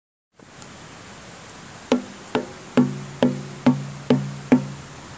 el sonido de una tapa de metal golpeada
the sound of metal cover been hit